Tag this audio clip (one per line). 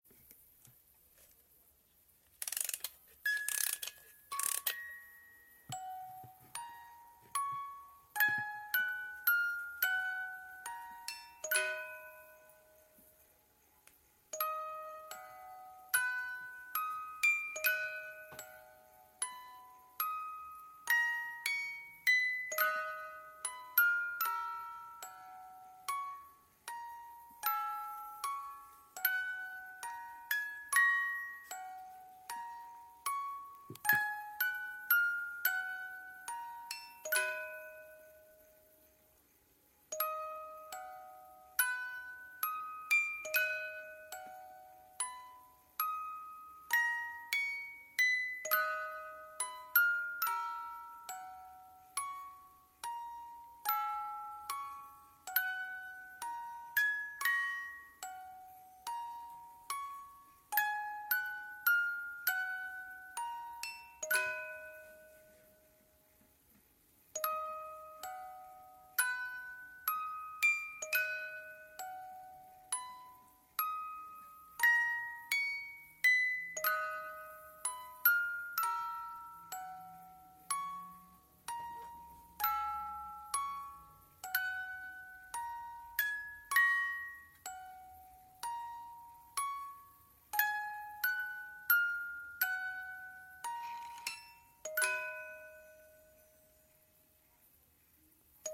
background-sound
box
creepy
dramatic
ghost
Gothic
haunted
Music
nightmare
phantom
scary
sinister
spooky
suspense
terrifying
terror
weird